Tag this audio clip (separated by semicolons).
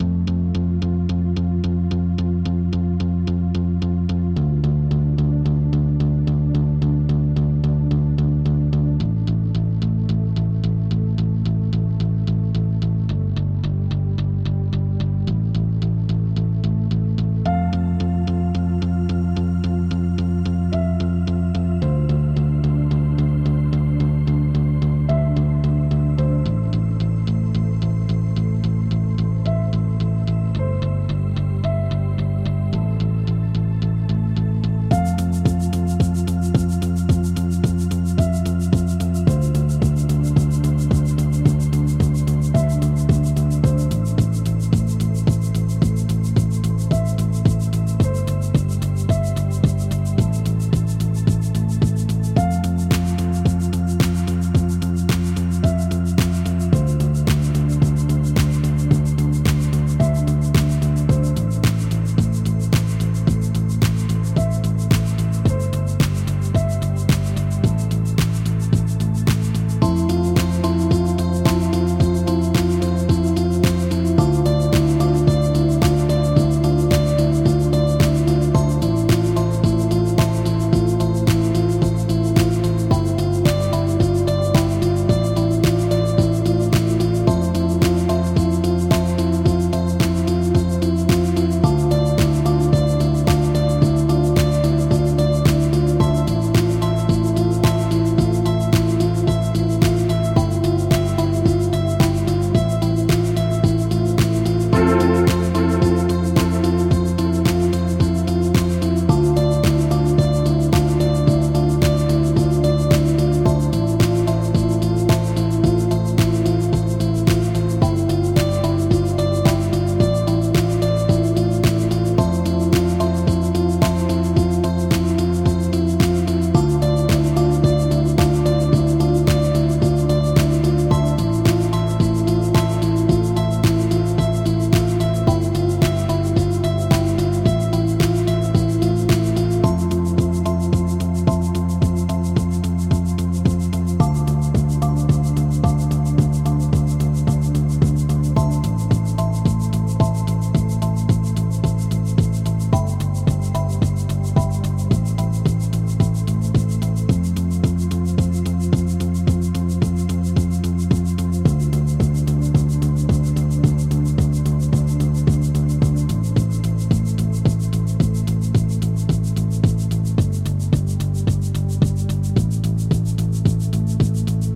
brass; synth; nandoo; base; sound; voice; electro; track; original; guitar; noise; deep; loopmusic; sample; electronic; effect; ambiance; atmosphere; music; ambience; bass; digital; pop; messany; loop